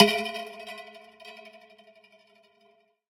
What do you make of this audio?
drumbrush shot 3
Sounds created with a drum-brush recorded with a contact microphone.